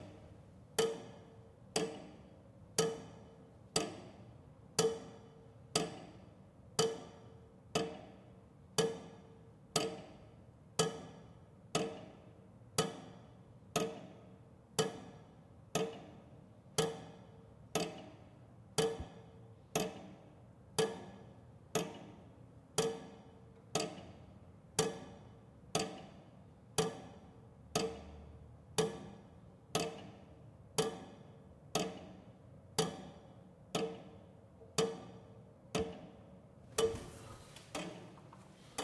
Clock Old
XIX century clock II
old-clock, tick-tack, tic-tac